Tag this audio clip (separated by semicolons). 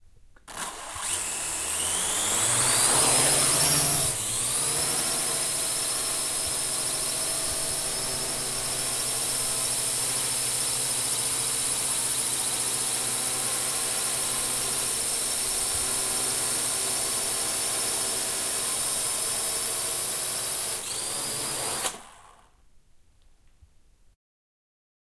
close; drone; engine; flying; h6; helicopter; launch; plane; propeller; quadrocopter; swirl; xy